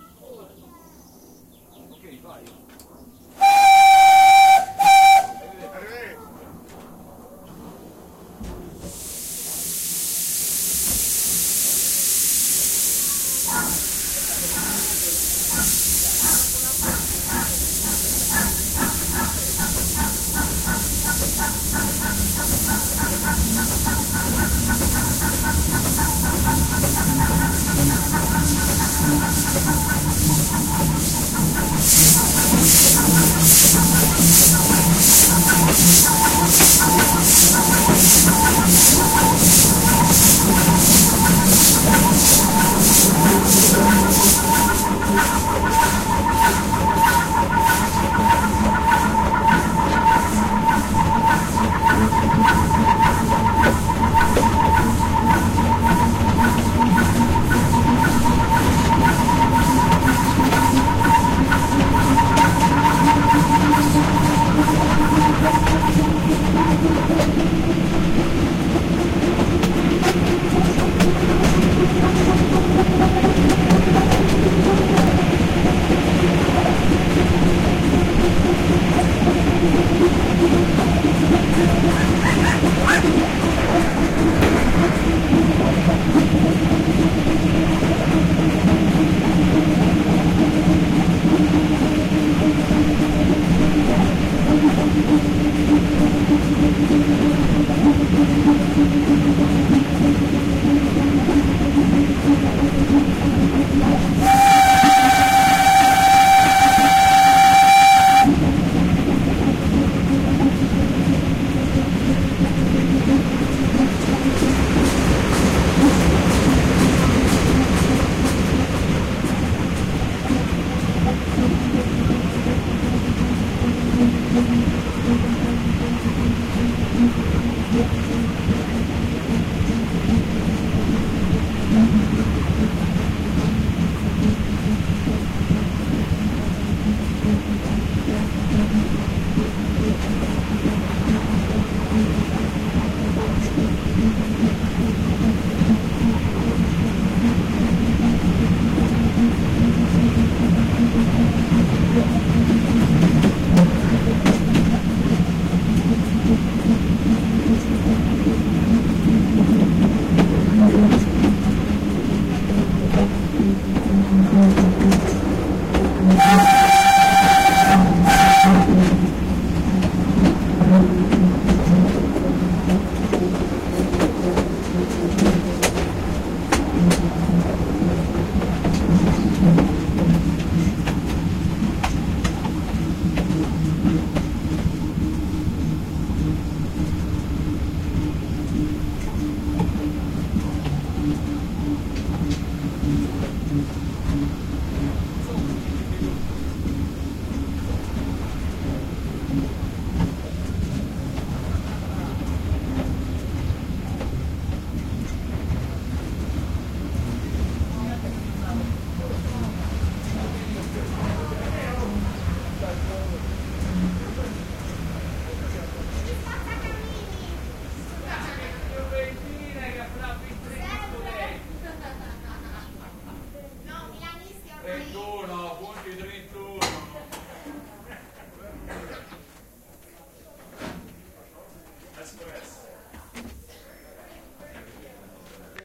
20130503 Little Green Train Sound File LS 50090
The recording presents the sound of a little steam locomotive with a single carriage departing and arriving, blowing the whistle with in the background dogs and voices of Sardinians in the train and on the station. Early May, late afternoon.
Recorded with Olympus LS5.